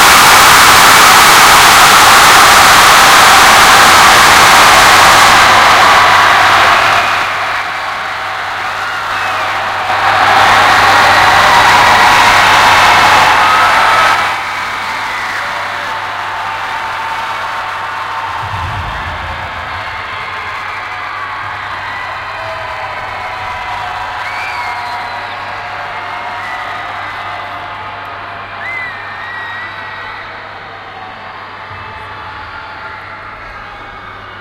London Bridge - Mass scream in Tate Modern
general-noise, soundscape, ambience, background-sound, atmosphere, ambiance, city, london, field-recording, ambient